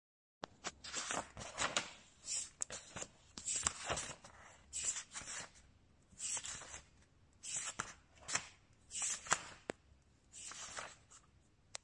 Folhear um Livro
flip through a book
book, pages, relax